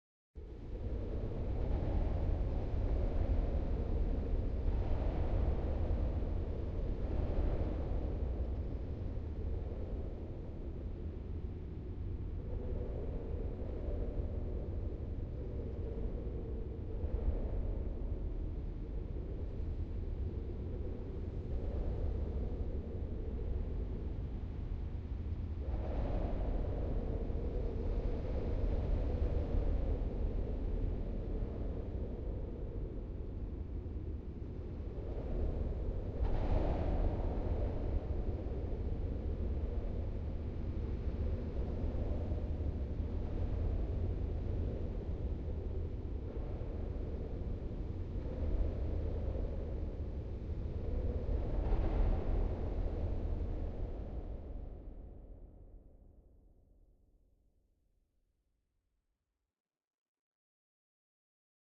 synthetic "cavern" soundscape i did for a film using a wind recording, pitch shifter, reverb and chorus.simple, but good as a subtle backdrop.
eerie, cavern, ambient, cave, dark, hall, soundscape, synthetic